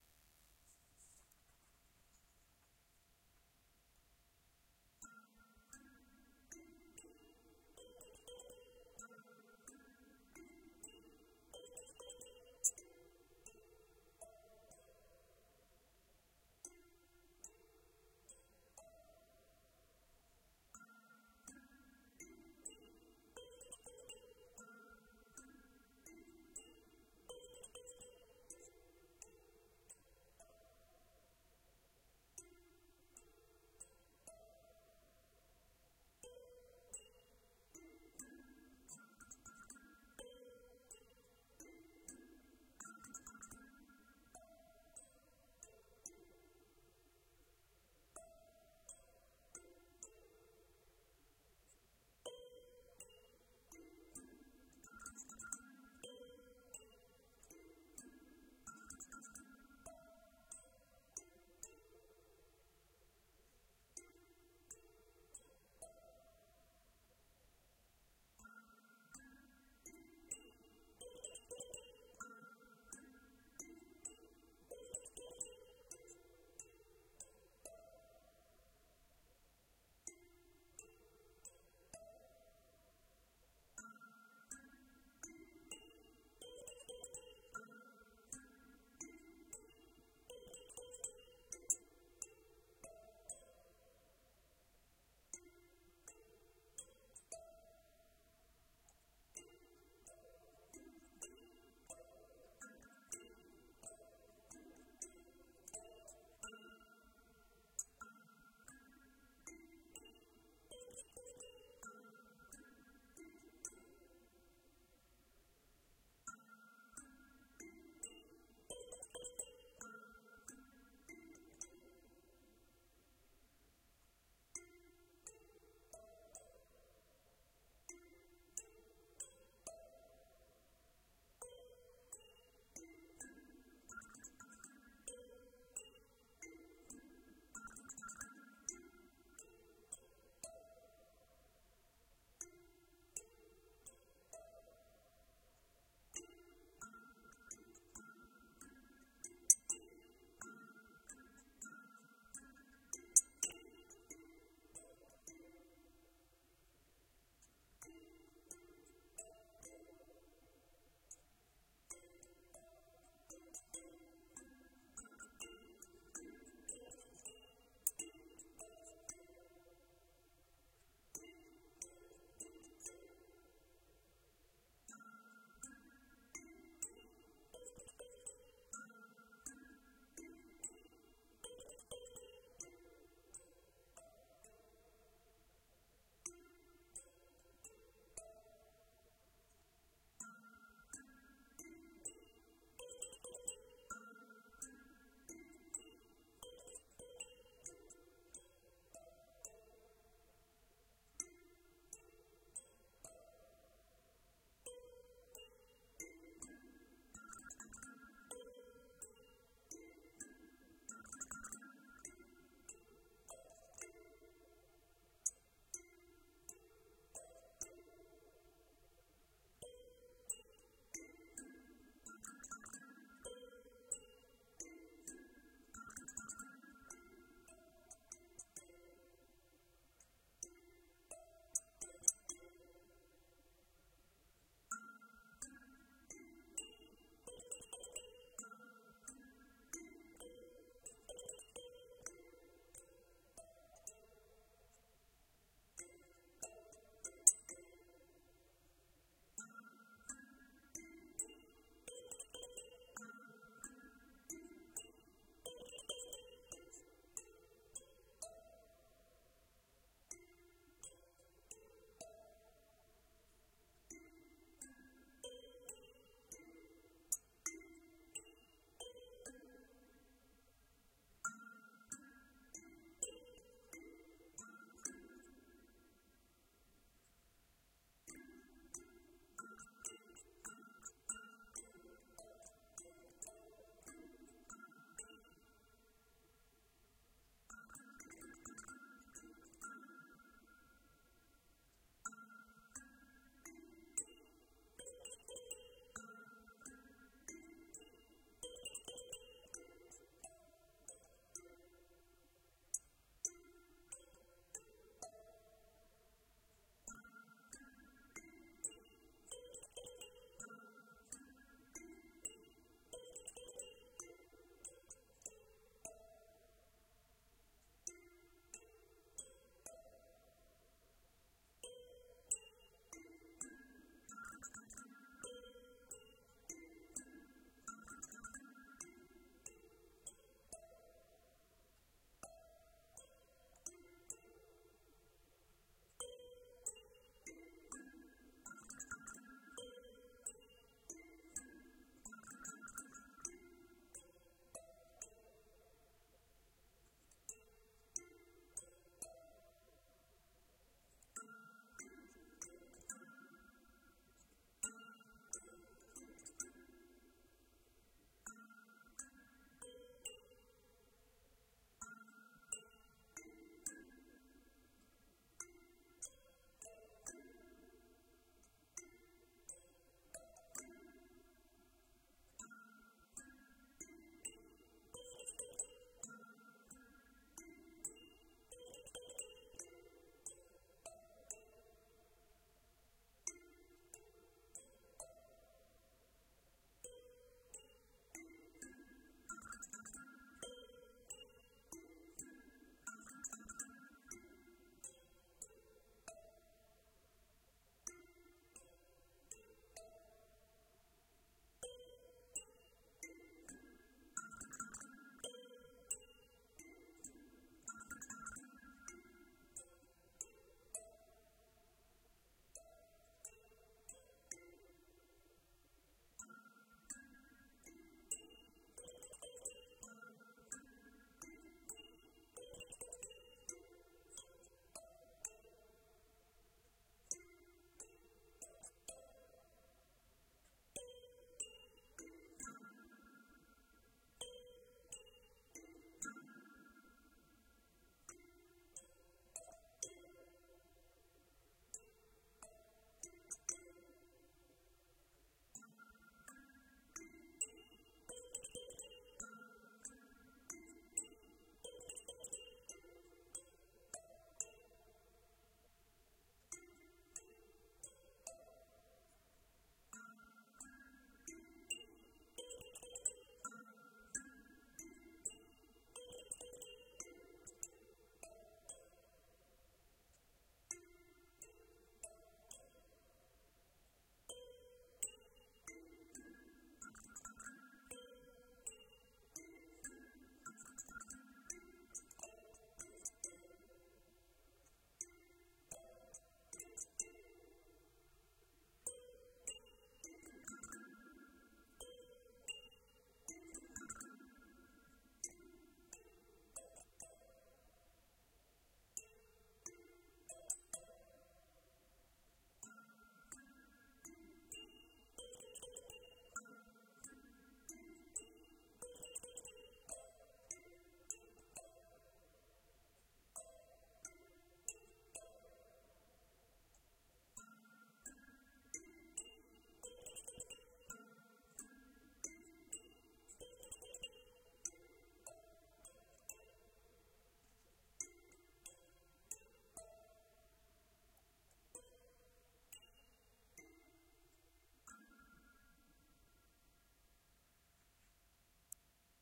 Recording of a Hokema Kalimba b9. Recorded with a transducer attached to the instrument and used as microphone input with zoom h2n. Raw file, no editing.
filler, instrumental, kalimba, loops, melodic, thumbpiano